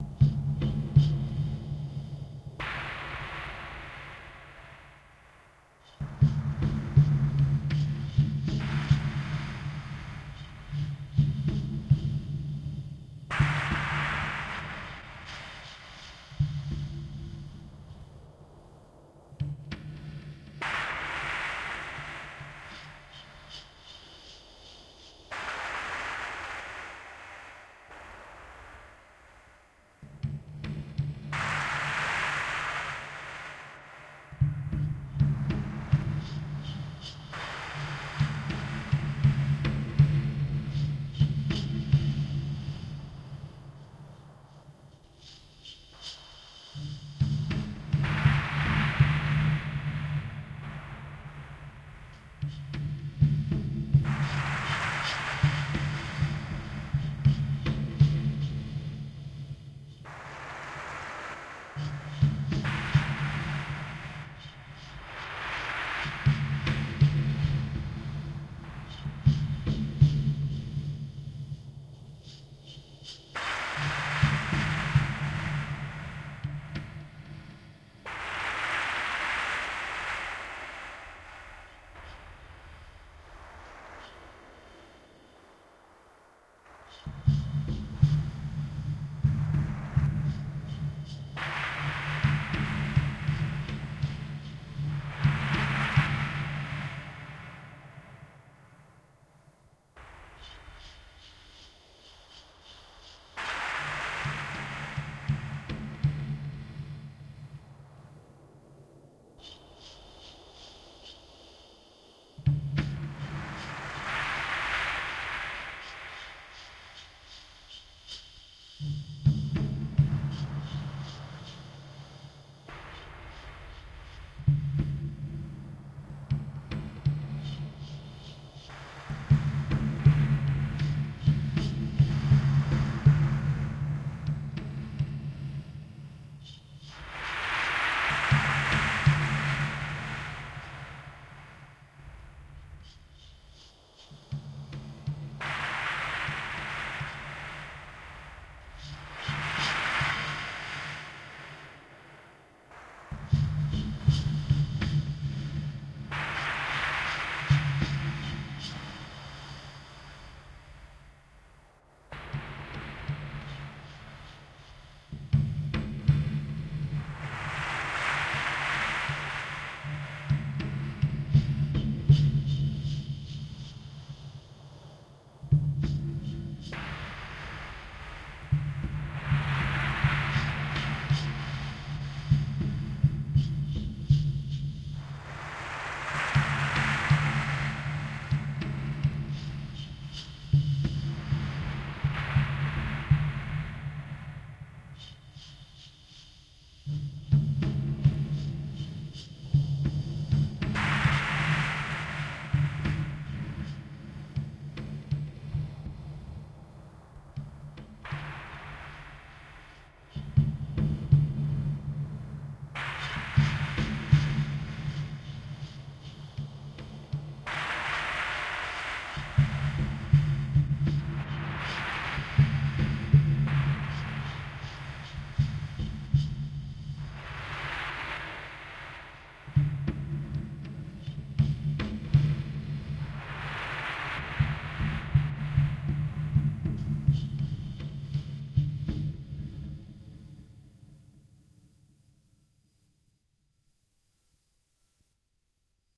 8tr Tape Sounds.
artistic, fantastic, futuristic, magical, notions, philosophical, pluralistic, scientific, tape